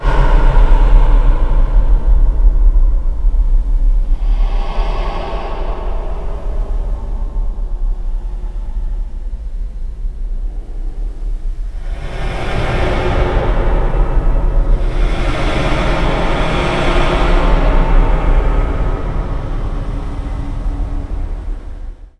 Original track has been recorded by Sony IRC Recorder and it has been edited in Audacity by this effects: Paulstretch.